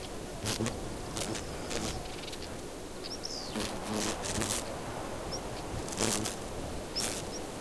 a Scolias wasp fluttering inside an insect net. Sennheiser K6-ME62+K6-ME66 > Shure FP24 > iRiver J120. Unprocessed / una avispa Scolias aleteando dentro del cazamariposas